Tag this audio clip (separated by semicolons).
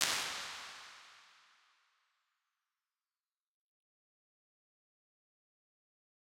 echo; space; reverb; response; impulse; IR; acoustics; convolution; room